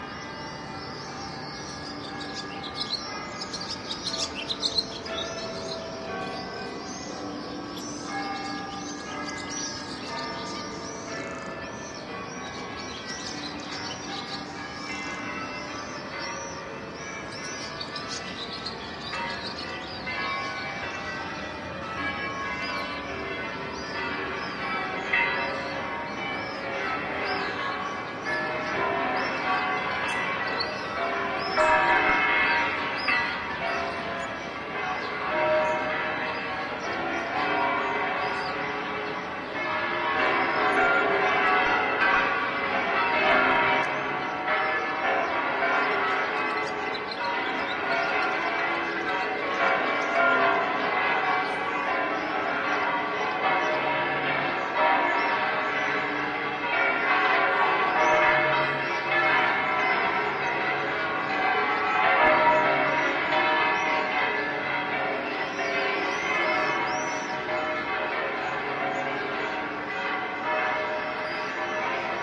20060528.swallows.bells
Swallow calls on a background of distant church bells and city rumble. Sennheiser ME62(left) + ME66 (right channel) > Shure Fp24 > iRiver H120 (rockbox firmware) /canto de golondrinas sobre fondo de campanas lejanas y ruido de ciudad
bells, birds, field-recording, nature, spring, swallows